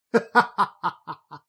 A man's hearty laugh
Original recording: "Male Laughter 01" by Arbernaut, cc-0
hearty, laugh, male, man